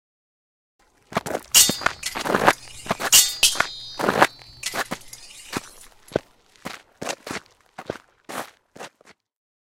Sword Training 1
A brief sparring match with swords. Crunching, rapid footsteps, mild sword clashes, no particular climax. Can stand on its own or be looped for a longer scene.
“Swords Clash and Slide 1” by Christopherderp
“Sword Clash 3” by Christopherderp